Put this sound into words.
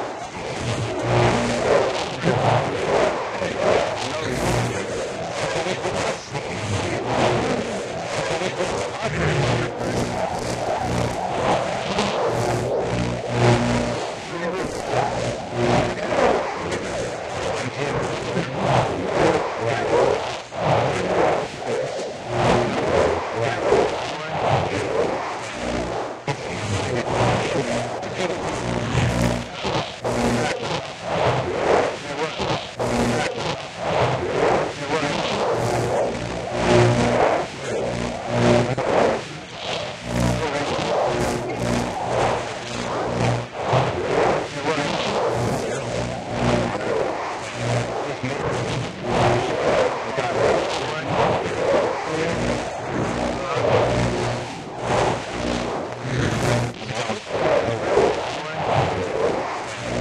Radio-Interception-3A1
Intercepted Radio Transmissions.
Sound Effects created for use in a Project with The Anthropophobia Project.
Sounds of intercepted transmission, radio reception.
"Money can Destroy everything it Touches" - George Zong